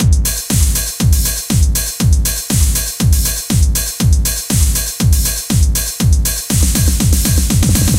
PapDrum Fill 4/4 120bpm
This is an ending fill of the same drum line from a recent song I made.
120-bpm, 4, drum-loop, fill, hard, quantized, techno